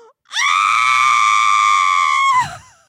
women scream AAA
a woman, Noor, screams "AAAH"
shouting, woman, yell, torture